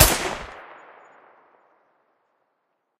1911 suppressed pistol shot